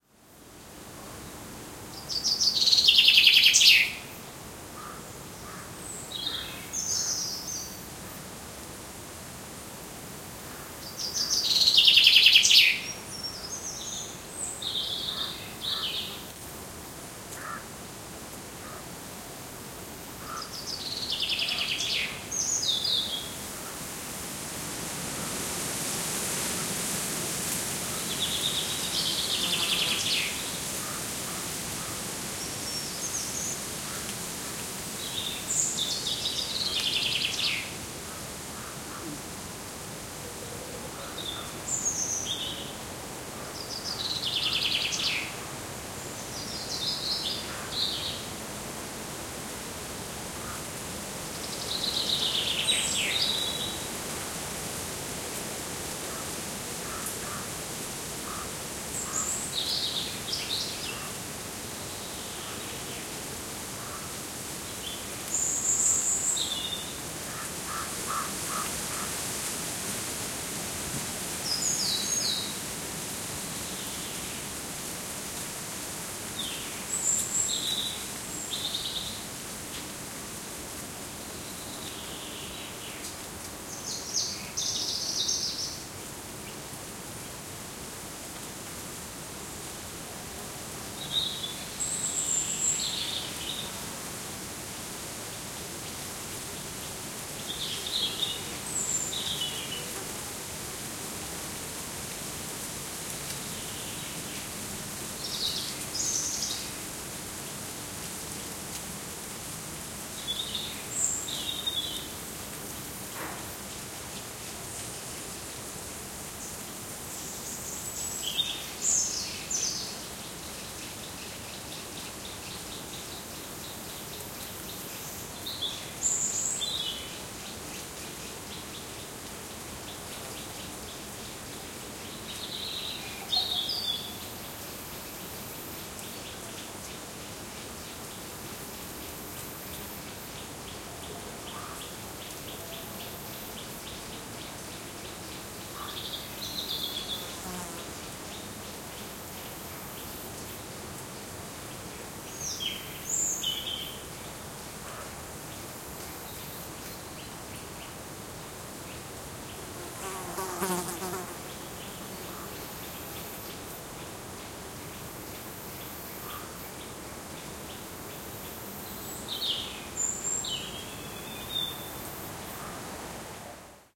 20190626.still.forest.099
Starts with callings from a common chaffinch, crow squawking, murmur of leaves, and some insects. EM172 Matched Stereo Pair (Clippy XLR, by FEL Communications Ltd) into Sound Devices Mixpre-3. Recorded near El Roblón de Estalaya, a remarkably old Oak in N Spain (Palencia province)
birds, nature